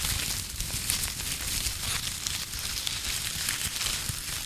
paper rustle 2
Paper rustled in front of mic
crumple, paper, rustle